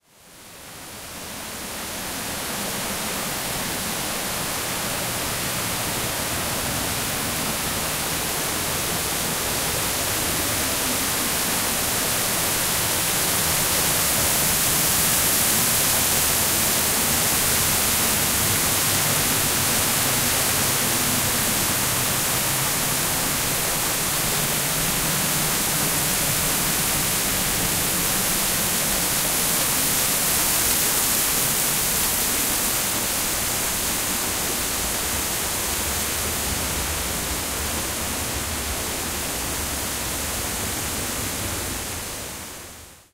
Walking behind a waterfall
Walking behind the Sgwd Yr Eira Waterfall in Wales, UK. Recorded with a Zoom H5 with an MSH-6 stereo mic on a calm winter afternoon.